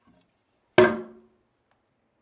Closing a Toilet Lid